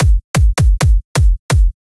This is a simple beat that can be looped. Made it in FL studios. Can use in countless ways.
beat, drum, cleaner, funky, improvised, loop, groovy, dance, drum-loop, bass